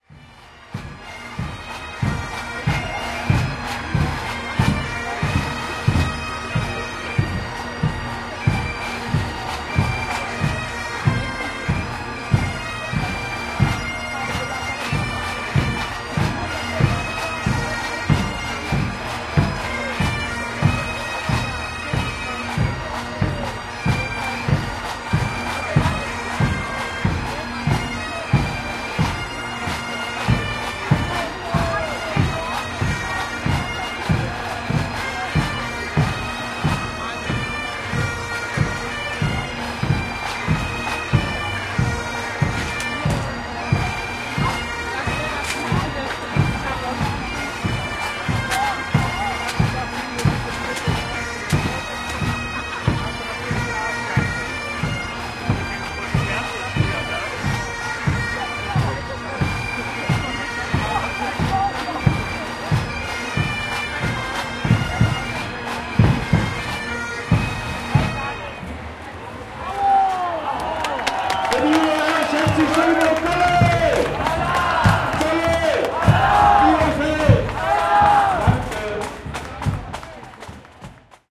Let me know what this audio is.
carnival parade cologne drums and pipes
Carnival parade in the city of Cologne. A Scottish band of bagpipes and drums passing by, not very typical for the music normally played during German carnival parades.